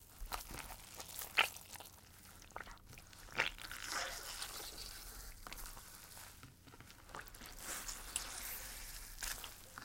gore blood flesh gurgle
blood, flesh, gore, liquid, splat, squelch, squish